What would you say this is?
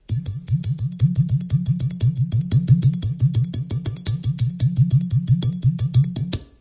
Playing a Brazilian 1/16 samba groove on a sphere shaped glass vase, tapping with one hand on the outer surface, with the other on the opening, thus producing a low tone, which resembles the tone produced by an udu. The udu is an African drum originated by the Igbo and Hausa peoples of Nigeria, normally built of clay. Vivanco EM35, Marantz PMD671.